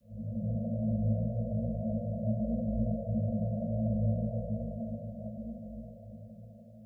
ambient horror, well maybe it would be use it to create suspense. Recorded whit LMMS (Linux MultiMedia Studio)
macabre, dramatic, haunted, spooky, background-sound, terror, suspense, Gothic, bogey, sinister